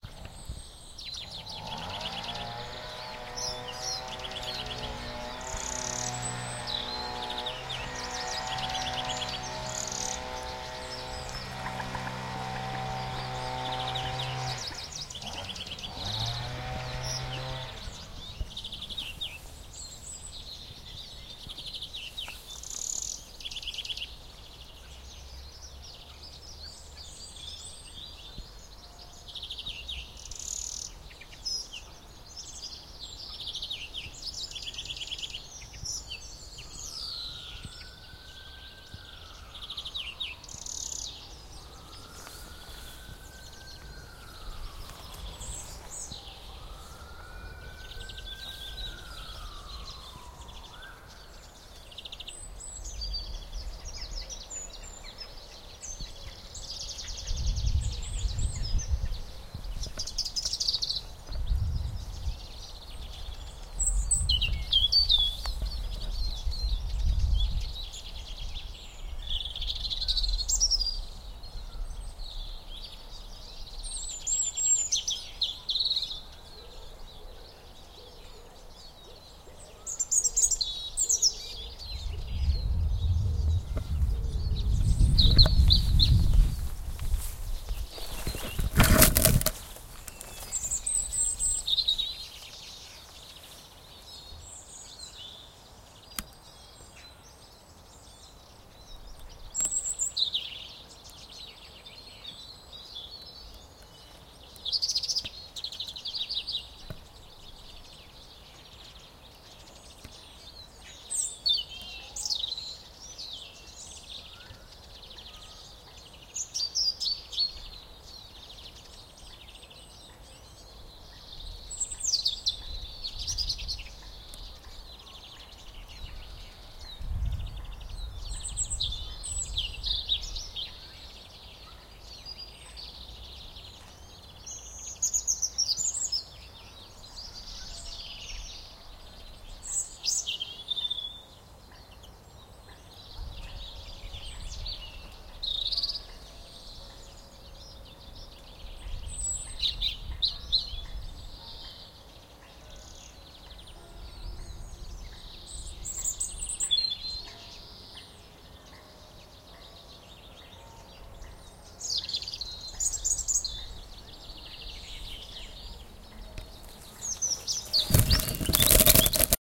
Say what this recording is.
St Albans Birds and Motorsaw and Police Siren
Bird singing and motor saw action with police siren recorded in march at St Albans City